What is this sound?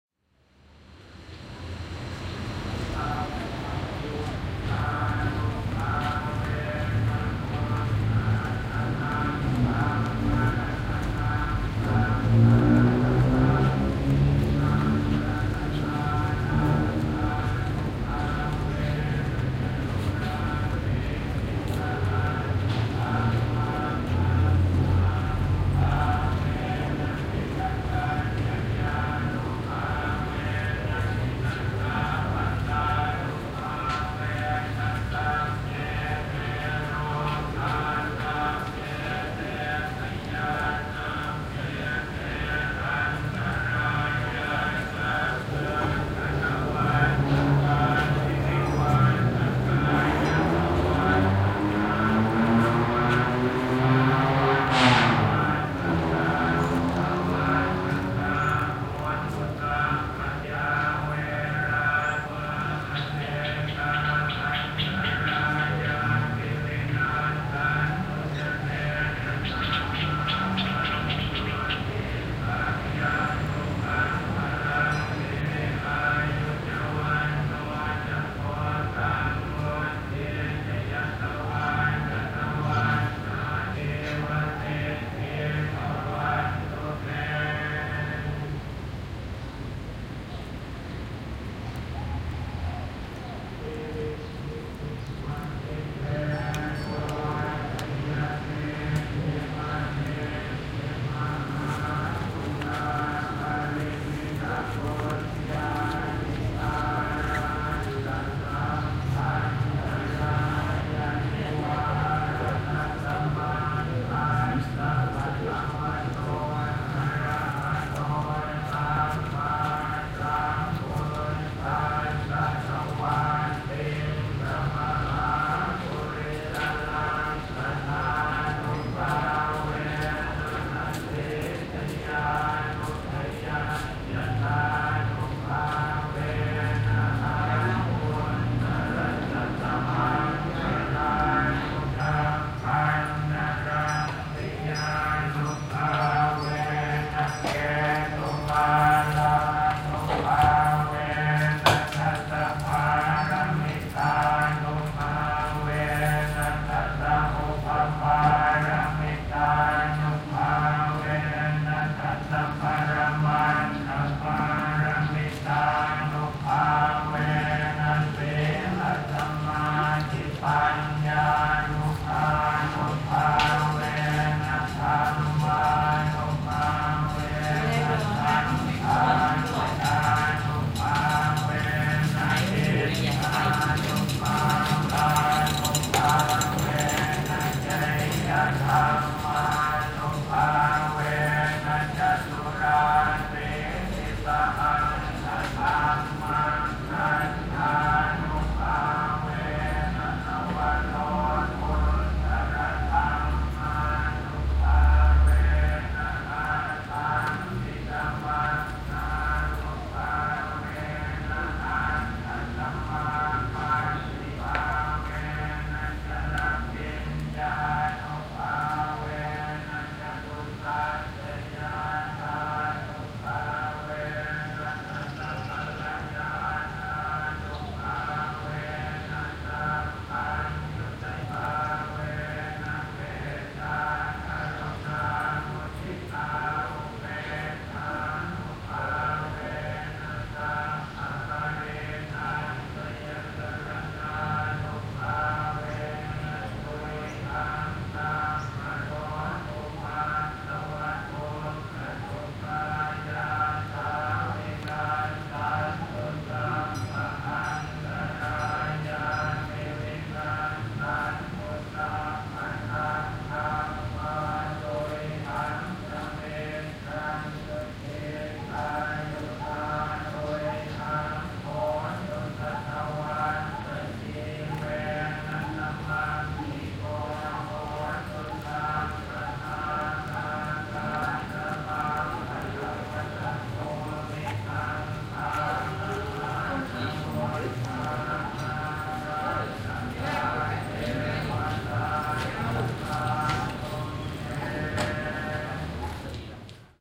SEA 3 Thailand, Bangkok, Wat Bowonniwet buddhist Temple, Chants from Loudspeakers (binaural)
Buddhist chants (from loudspeakers) in Temple Wat Bowonniwet in Bangkok/Thailand
City ambience at background, Workers passing at 3m05s,
binaural recording
Date / Time: 2016, Dec. 30 / 16h54m